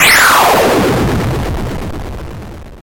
Glitch 6 - Spindown
A glitch sound effect generated with BFXR.
bfxr, lo-fi, noise, glitch